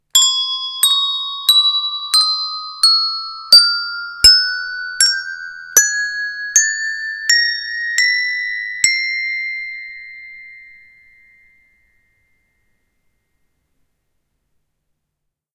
All of the sounds in my "Hand Bells, Singles" pack played in a chromatically ascending scale from Low-C to High-C. There are 13 notes in total.
An example of how you might credit is by putting this in the description/credits:
And for more awesome sounds, do please check out my sound libraries or SFX store.
The sound was recorded using a "H1 Zoom V2 recorder".
Edited using Audacity on 15th March 2016.